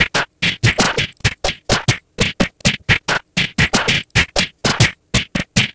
quick jabs and punch
26 27 Punch's its good for sprites movie and games good luck